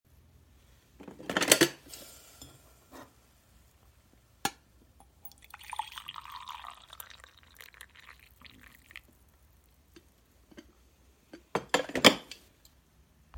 pouring coffee
The sounds of pouring a cup of coffee and putting the pot back on the heating unit. Recorded with iPhone 7 plus.